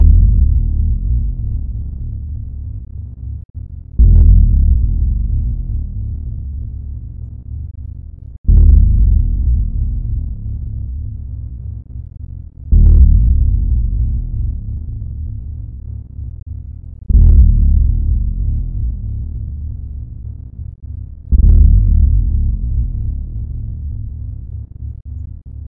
A planet federation tries to have a mutual time. You hear six o'clock time signal. Full time isn't twelve o'clock, but ten o'clock in this system.